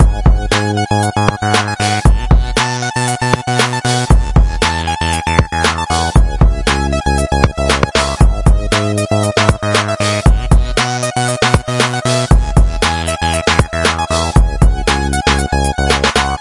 Fela Pena
Db, minor, 117bpm